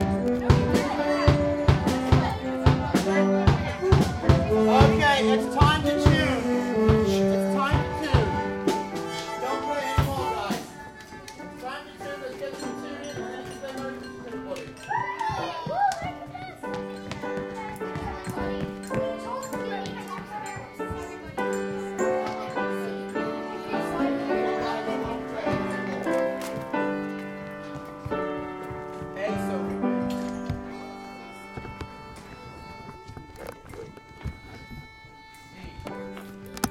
Primary school orchestra tuning up before their Christmas concert. You can hear violins, drums, saxaphone etc and the music teacher (English accent) telling them what to do. The piano comes in about half way through and gently starts to play O Come All Ye Faithful